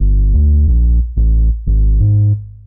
90 Subatomik Bassline 04
fresh rumblin basslines-good for lofi hiphop